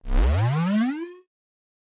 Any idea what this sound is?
A longer synth glide.